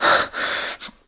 Breath Scared 09
A male agitated scared single breathing sound to be used in horror games. Useful for extreme fear, or for simply being out of breath.
horror, videogames, indiedev, scary, breathing, sfx, game, frightening, gaming, epic, male, scared, breath, gamedeveloping, fear, indiegamedev, video-game, games, rpg, frightful, fantasy, terrifying, gamedev